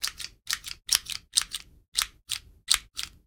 wire snippers
A few empty snips from a small wire cutter.